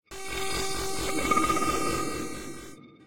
Sound obtained from the noise of some marbles in an aluminum bottle. After recording, the sound was processing in ableton live program.